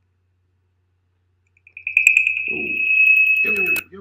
Feedback from a VO session mic. Might be good for a dinosaur chip.